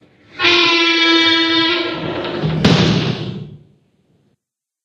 Okay, about this small bibliothek there is a story to tell.
Maybe a year ago my mother phoned me and asked if I could give her a hand because the door to her kitchen was squeaking.
After work I went to her, went down to the cellar, took the can with the lubricating grease, went upstairs and made my mother happy.
Then I putted the grease back and went upstairs. Whe sat down, drank a cup of coffee. Then I had to go to the toilet and
noticed that the toilet door was squeaking too. So I went down to the cellar again and took once again the grease.
Now I thought, before I make the stairs again, I'll show if any other thing in my mothers house is squeaking.
It was terrible! I swear, never in my entire life I've been in a house where so many different things were squeaking so impassionated.
First off all I went back to my car and took my cheap dictaphone I use for work. And before I putted grease on those squeaking things I recorded them.